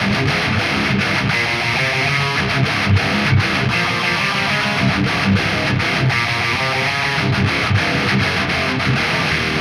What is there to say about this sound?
Metal Riff 1
Just a little riff I recorded. Not the cleanest recording, but enough for me to remember, what i played ;)
I allow to use the recording of the riff.
The use of the riff itself (notes) is not allowed.
electric-guitar, guitar, heavy, metal, riff, rock, rythm